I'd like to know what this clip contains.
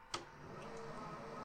11 CUE START
Recording of a Panasonic NV-J30HQ VCR.